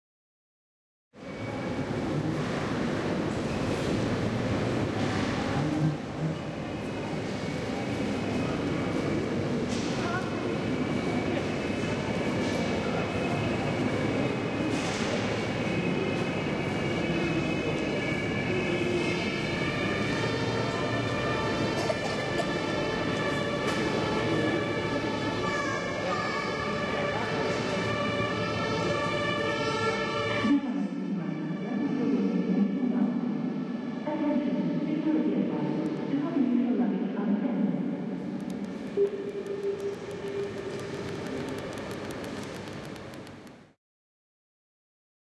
This is a september 24th 2013 field-recording, which I took in Heidelberg's mainstation while working at the Heidelberg Laureate Forum 2013.
You will hear a general mainstationhall with an increasing siren from a rescuevehicle, interrupted by a general security announcement for all passengers in the trainstation.
To be continued...